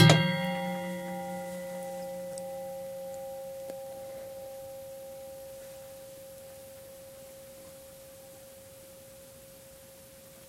A single ping noise made from hitting the blade of a circular saw. Fairly long decay.